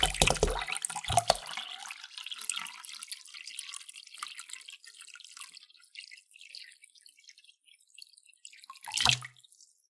water bottle pour out
Pouring water from a water bottle into other water.
binaural, bottle, liquid, pouring, water, wet